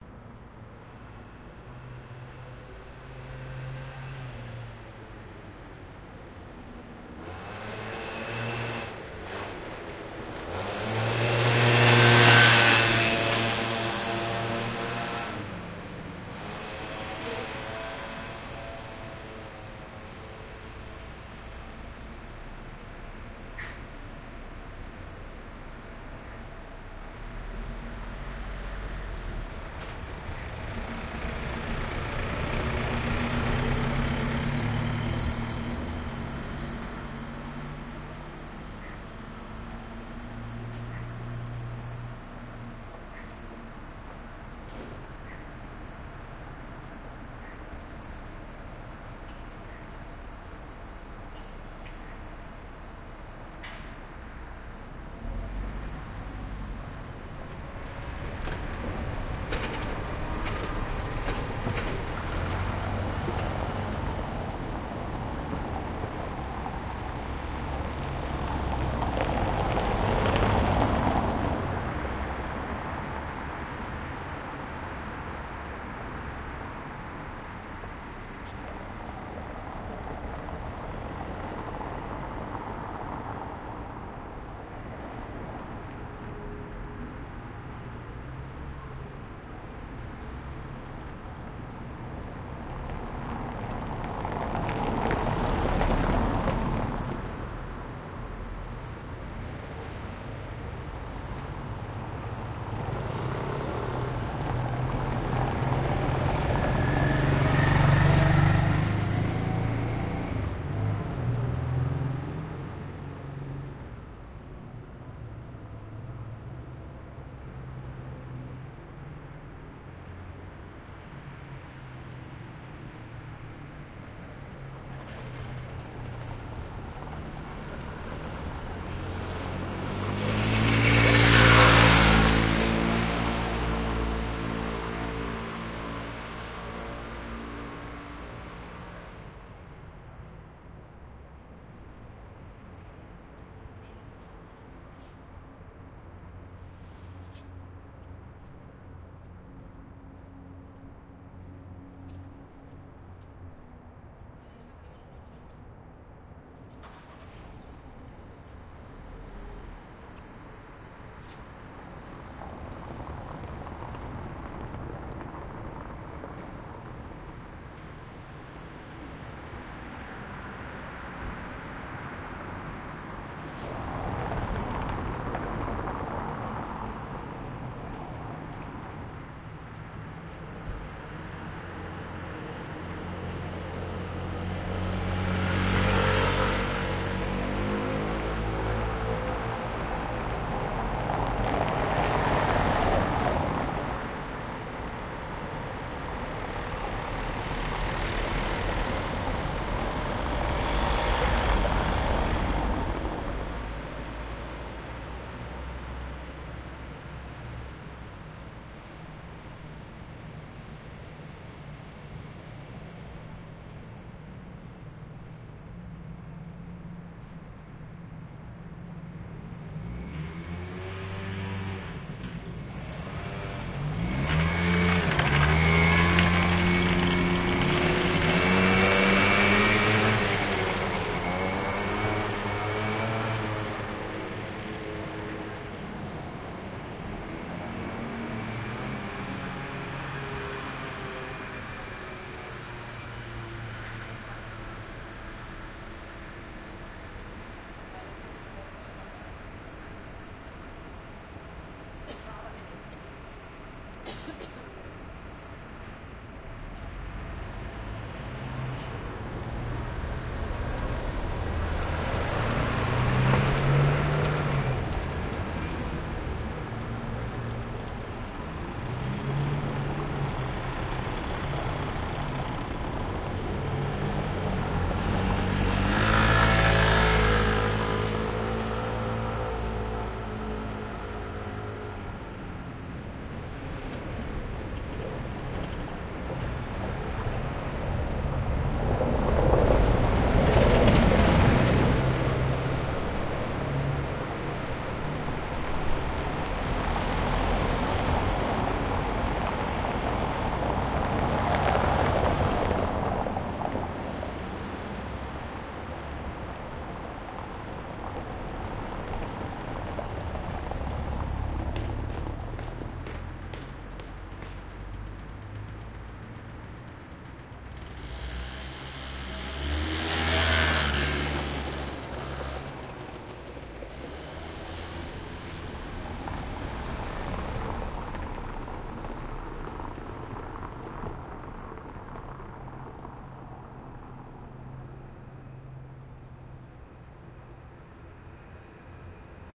Ambient Ciutat Nit Plasa Mons